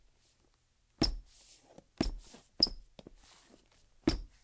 Shoe Squeaks 1-02
shoe squeaks on tile floor
floor, Shoe, squeaks